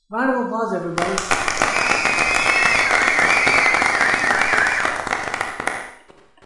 Round applause
applause, crowd